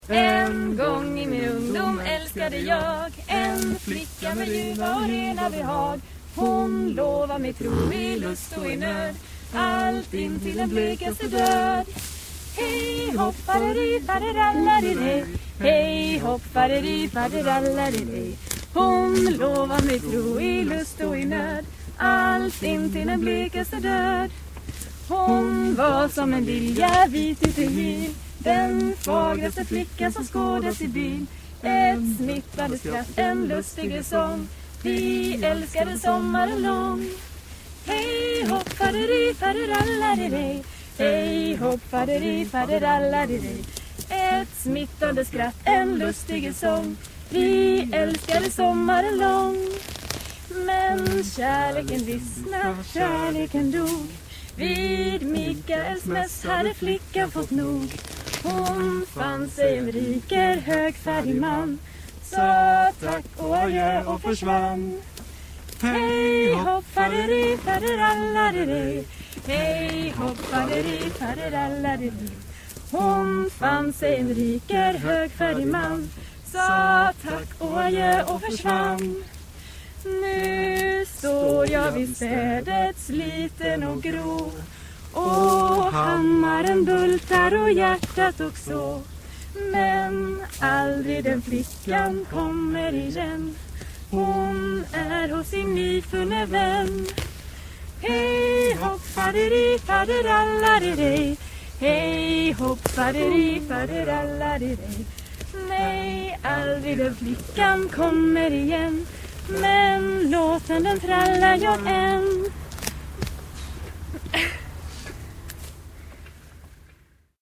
This is the first result of FastICA applied on this audio:
Full details about the process are in this scikit-learn tutorial I've written (the text is in Brazilian Portuguese):
ica, processed, sing, song, students, sweden, two, vocal, voices, worksong